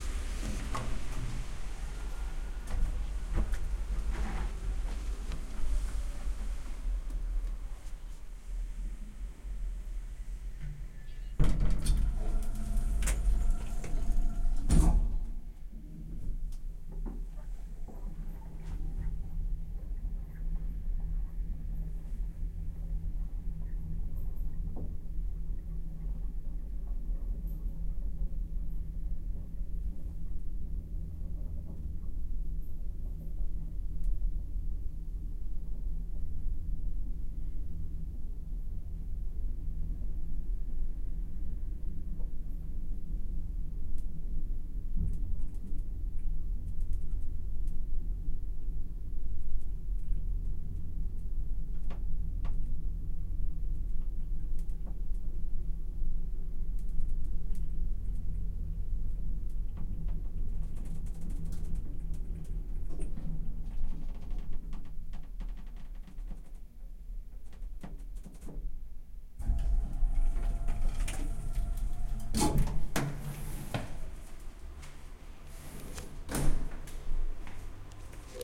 Entering elevator + Moving + Leaving elevator (Raining outside)
Entering and elevator (strong rain outside). Elevator moves 10 floors then it stops and leaving the elevator. Recorded with Tascam DR-03
rain, door, Elevator, lift